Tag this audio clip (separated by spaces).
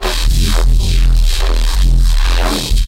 Bass Dark Evil FX Neuro Neurofunk Talking